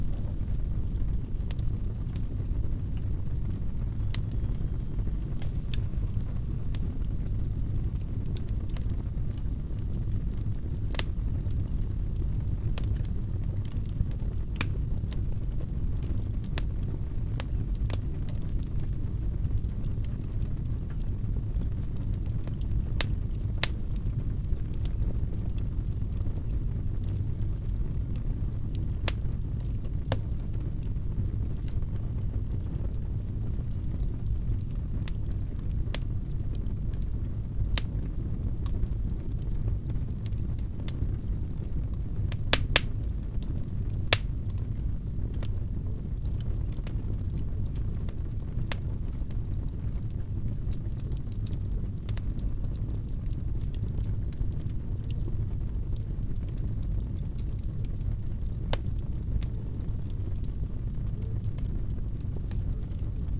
fire large rumbling in fireplace close good detail warm with loud snaps
rumbling, fire